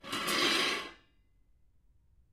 pans banging around in a kitchen
recorded on 10 September 2009 using a Zoom H4 recorder